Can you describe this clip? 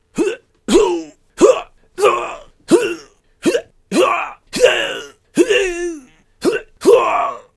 male pain sound effects
Some pain sound effects intended for a male character, such as for a fight scene, being beaten up, falling etc
scream, yell, pain, punch, fight, hit, agony, hurts, death, fighting